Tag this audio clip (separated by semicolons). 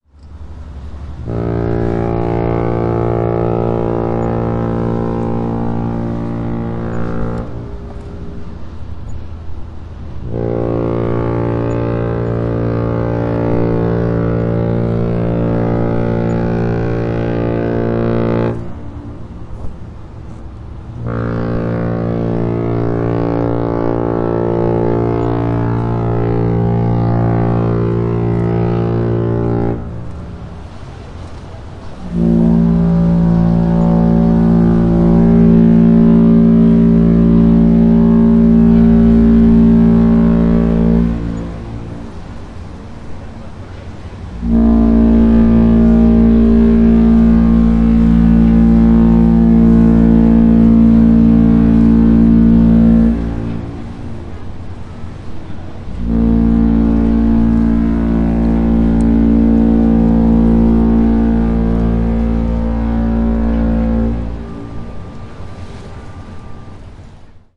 horn; ship